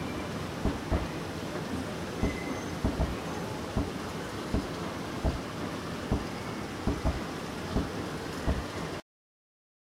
The escalator at Sainsbury's. Recorded with a Zoom H1n on location.